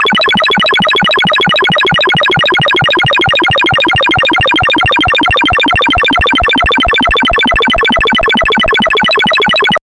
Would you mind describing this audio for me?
quantum radio snap066
Experimental QM synthesis resulting sound.
noise, soundeffect, drone, experimental, sci-fi